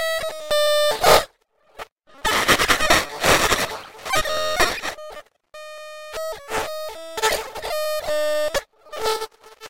03 President Bush playing with Miss Lewinsky's artificial teeth-cigar
glitch, mangled, nifty, noise, noise-dub, silly, useless